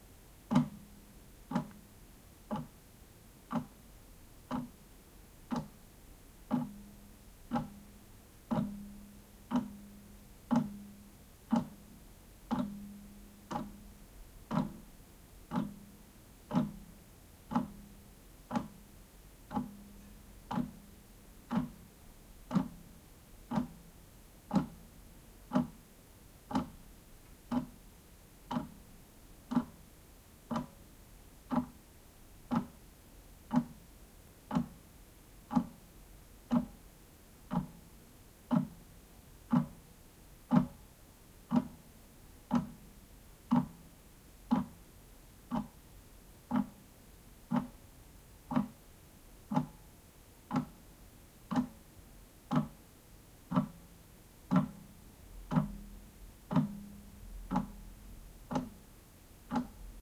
Clock ticks close to mic
The recorder was sitting on the clock. You hear the room noise of a kitchen.
clock, clockwork, close, hour, kitchen, tac, tic, tick, ticking, ticks, tick-tock, tic-tac, time, timepiece, wall-clock